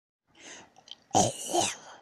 Here is another female zombie sound that I made.
creepy, female, growls, horror, monster, scary, sounds, spooky, terror, undead, zombie